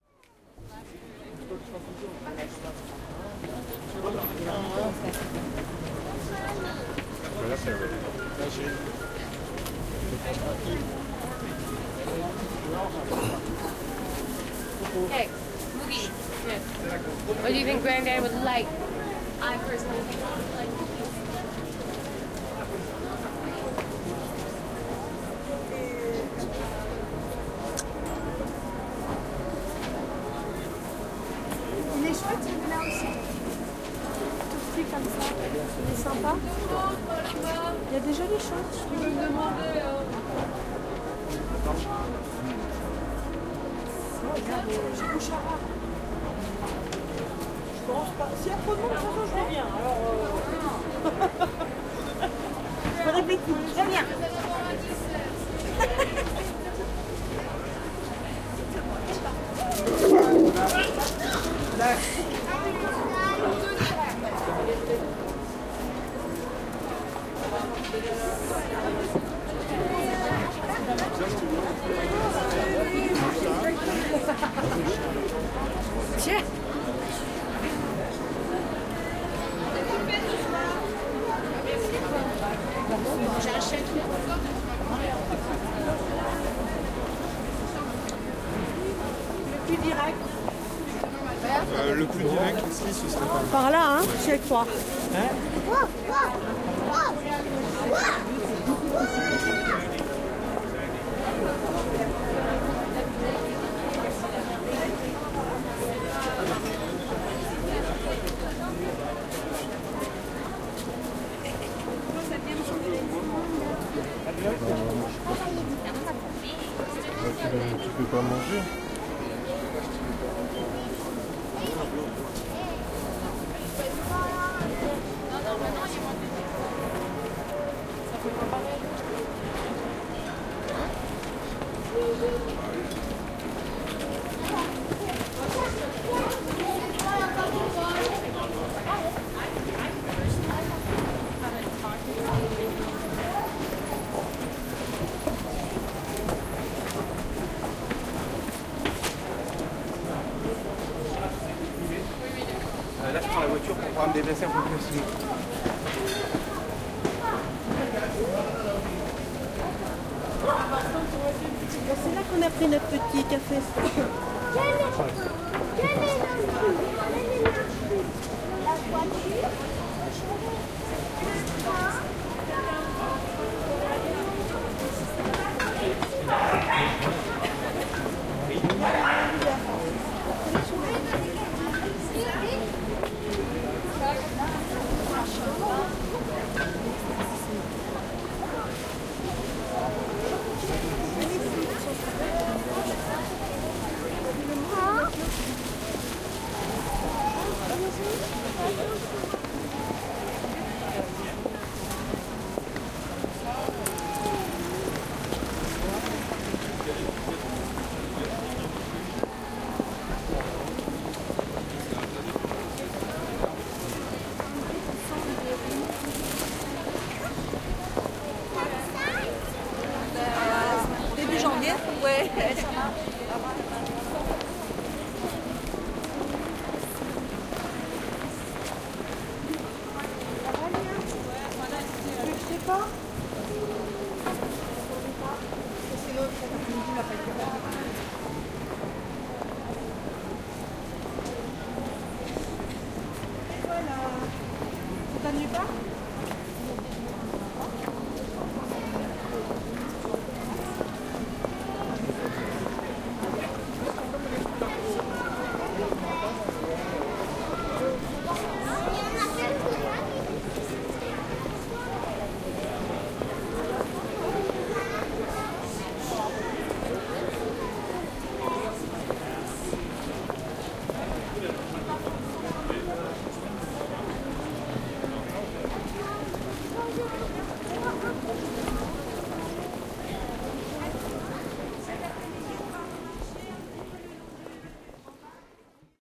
The ambiance of the famous Strasbourg christmas market, known as one of the oldest in the world (first edition took place in 1570) and the city's biggest touristic event, gathering thousands of people downtown for one month at the end of every year. I took my zoom h2n in different places, capturing a slightly different mood each time. expect lots of crowd sounds, background music, street atmosphere and... a lot of different languages (french, german, spanish, english.... All recordings made in MS stereo mode (120° setting).
ambiance, international, Alsace, people, Strasbourg, talking, marche-de-noel, France, street-ambience, languages, city, market, crowd, Christkindelsmarik, christmas-market, field-recording